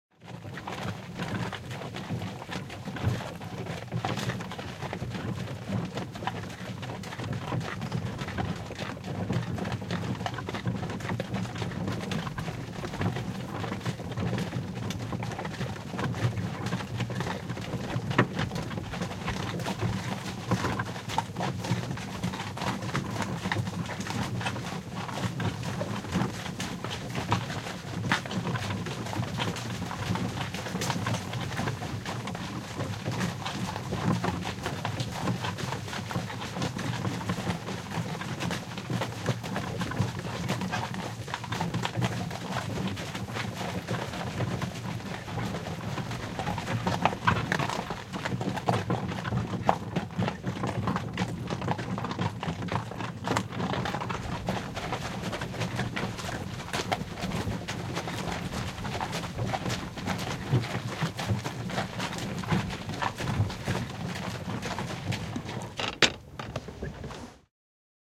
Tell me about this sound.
Horsewagon steady:stop dirt:pavement
Horsewagon from 18th century
driving, horse, wagon